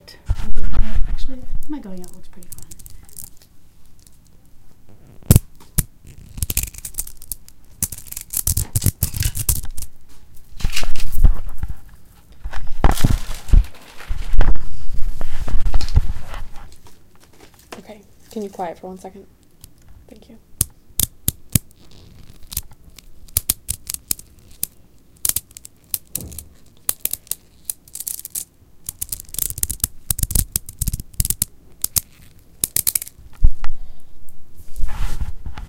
This is a collection of various sounds I recorded, including beads, a t-shirt, and a pen.